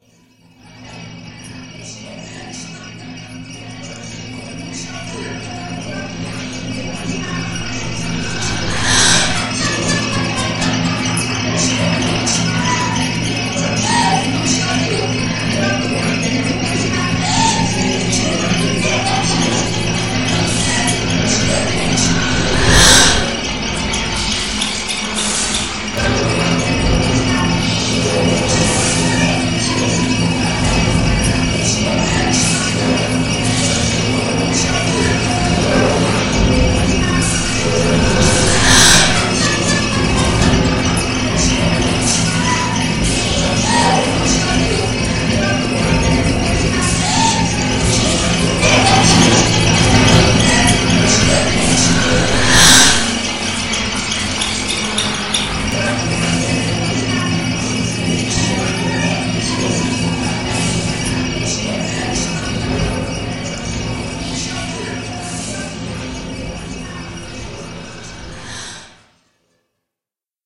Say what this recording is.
Hidden Track #1
All sounds and samples are remixed by me. A idea would be using this sound as a hidden track that can be heard on some artists music albums. e.g. Marilyn Manson.
alien, ambience, atmosphere, distortion, effect, electric, electronic, end, experimental, hidden, noise, processed, sample, track, vocoder, voice, weird